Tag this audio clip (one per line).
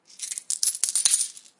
coin
money